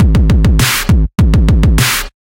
101 Dry glide drum s01
standard riphop drums
101, hip, rip, tempo